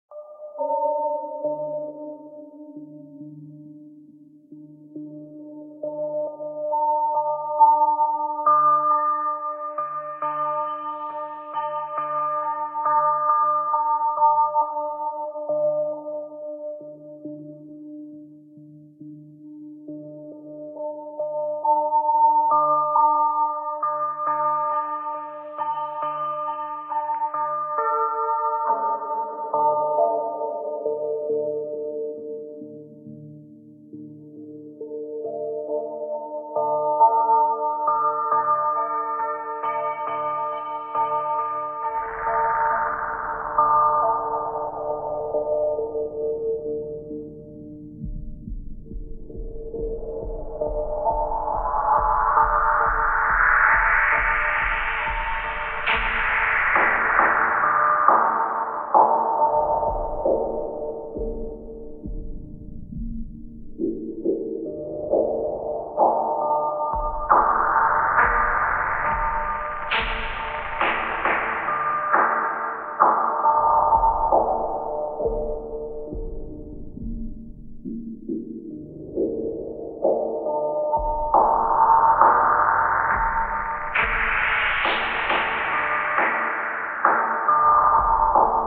Indian Chant
FX, Universe, confused, Efx, discovery, Sound, aggressive, chaotic, Soundtrack, bright, Strange, dark, Transformational, calm